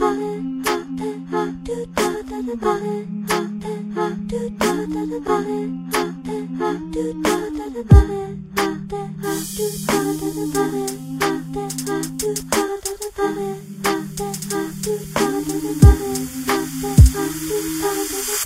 Loop NatureGoddess 01
A music loop to be used in storydriven and reflective games with puzzle and philosophical elements.
game, gamedev, gamedeveloping, games, gaming, indiedev, indiegamedev, loop, music, music-loop, Philosophical, Puzzle, sfx, Thoughtful, video-game, videogame, videogames